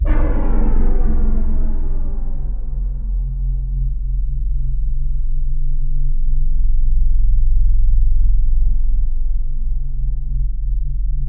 creepy ambient 3
spooky, suspense, thrill, anxious, creepy, weird, ambient, terror, sinister, nightmare, scary, loop, terrifying